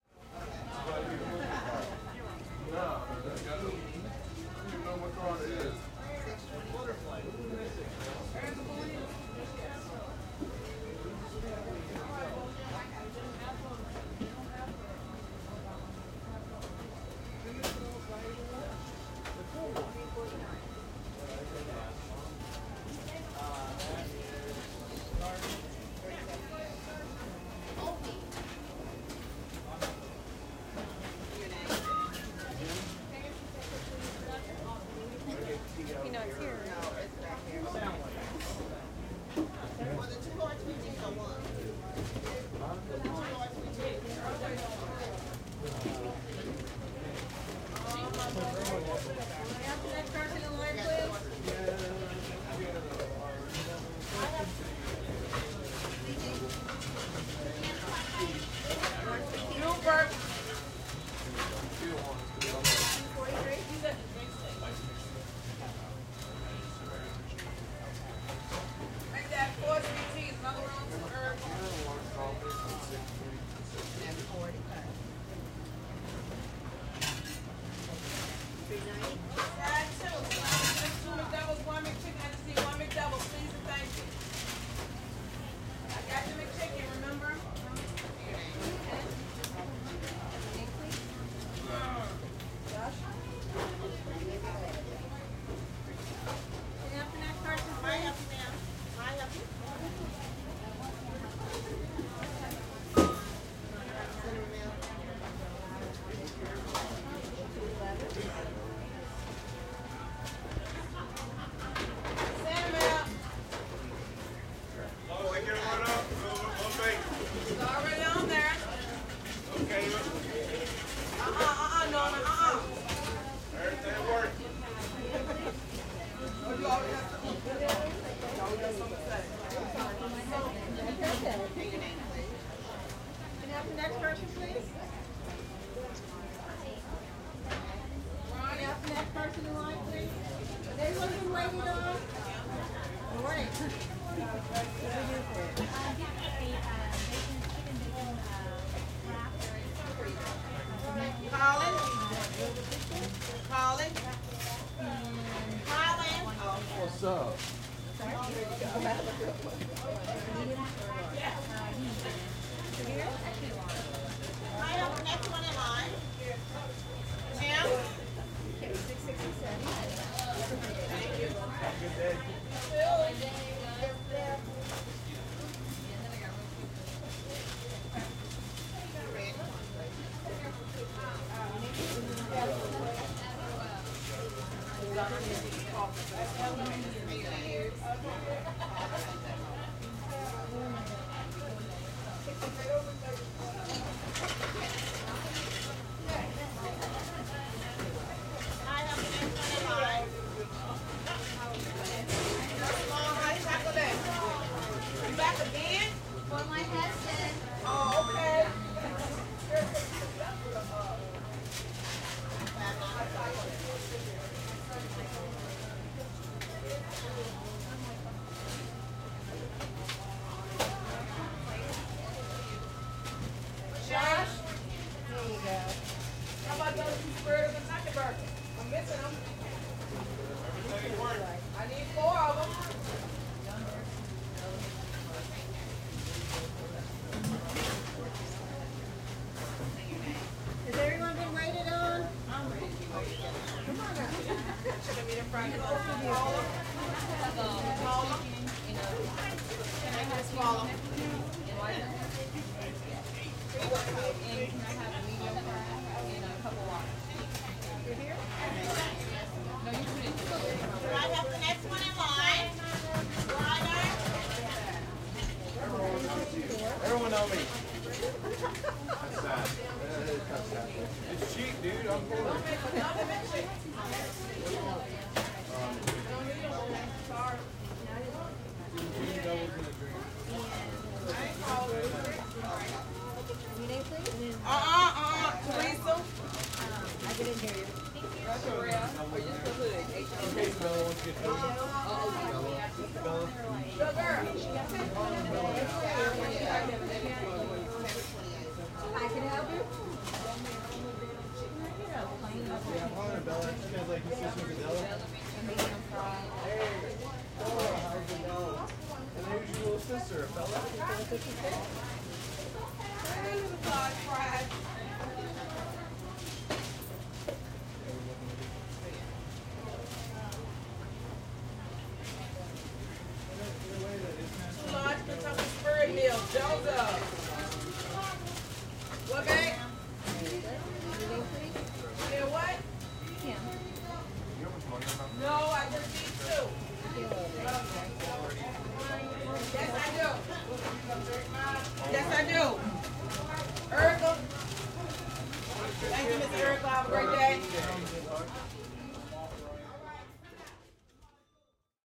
Soundscape of a fast food restaurant. Recorded at a McDonald's at lunchtime, in Louisville, KY.